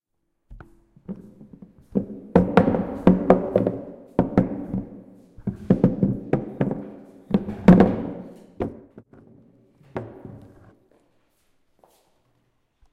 car.ampli.driving2
amplitud-automobile- driving.go
amplitud-automobile, driving, go